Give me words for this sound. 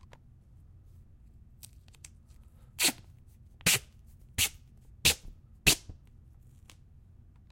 Getting duct tape from the roll